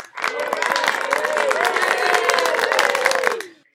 I got bored tonight so I made two recordings of clapping and cheering with a Mac's Built-in Microphone. This first one is shorter. Not very enthusiastic applause and cheers. Made with Audacity by layering tracks of me clapping and cheering.